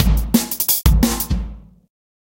eardigi drums 12
This drum loop is part of a mini pack of acoustic dnb drums
dnb, drum-loop, drums, jungle, percs, percussion-loop